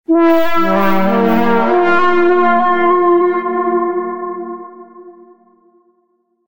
Done by Sound Forge FM synthesizer. Basically four added sine waves, each has some percentage of self-modulation(feedback).
The sound effects chain applied over the initial sound is: reverb, chorus, upward compression(sound forge wave hammer), pitch shift(down) and finally vibrato, to simulate a varying speed tape machine.
hornlike, Sound, Forge